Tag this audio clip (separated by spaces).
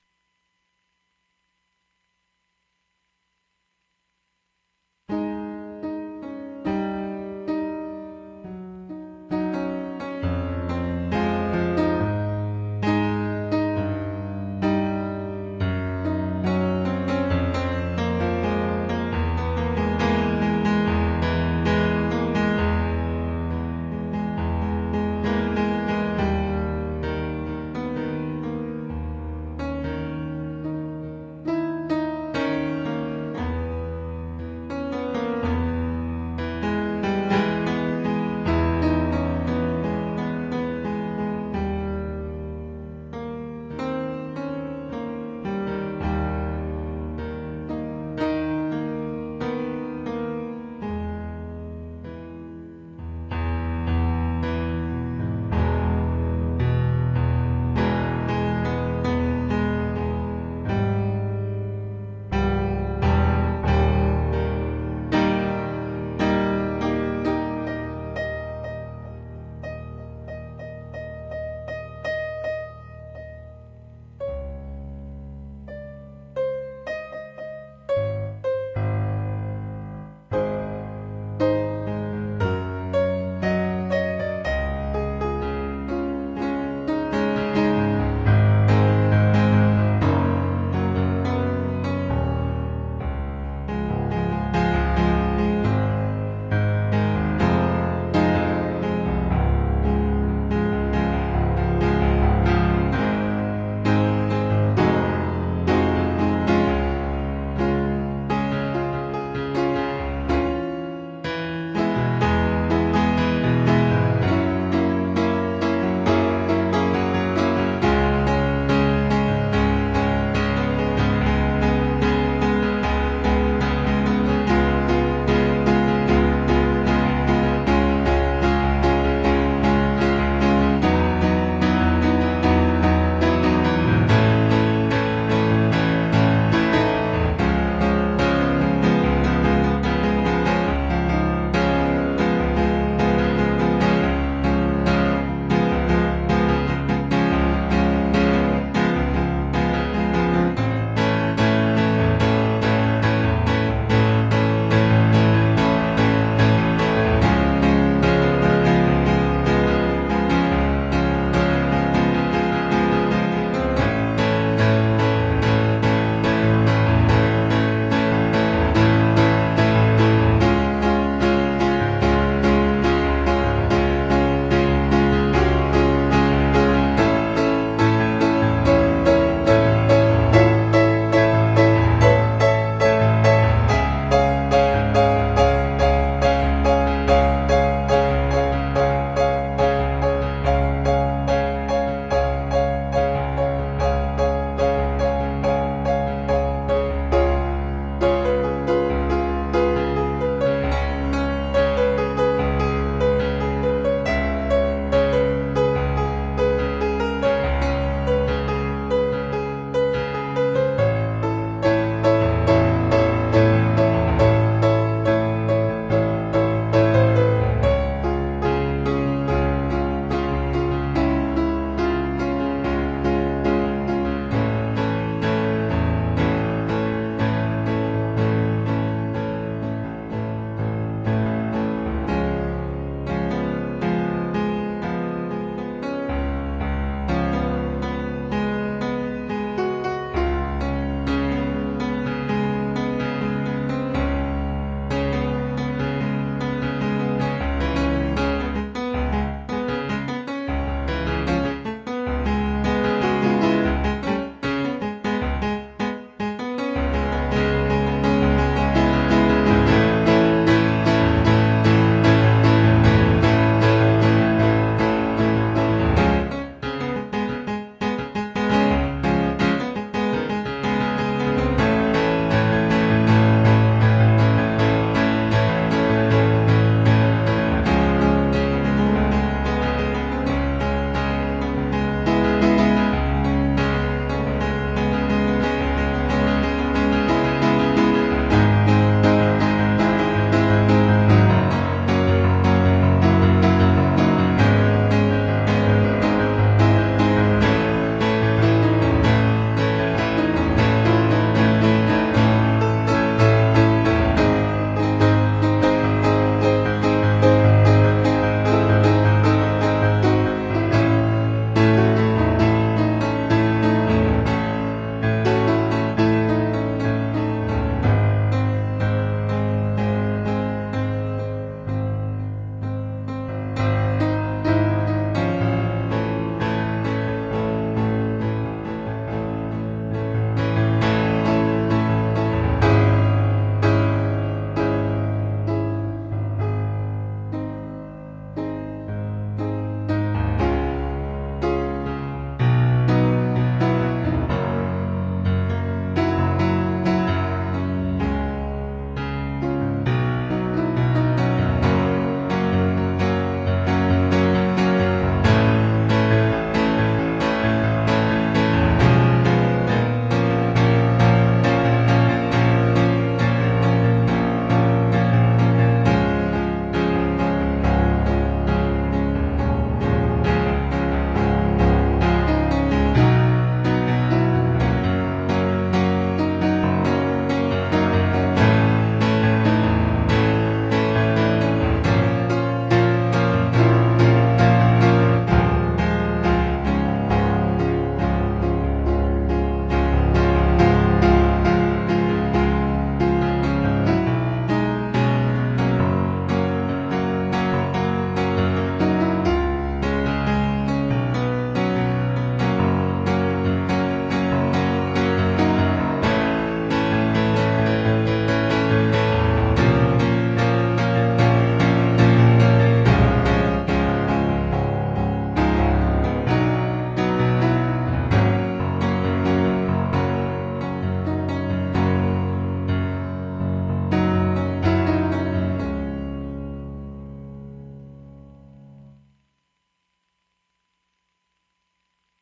improv piano unedited electric practice rough improvisation theme